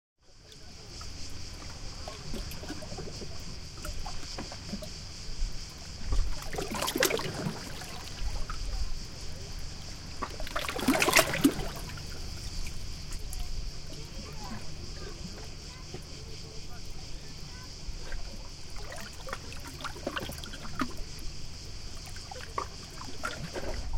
Small waves at a small pier
Small sea waves splashing at a small pier.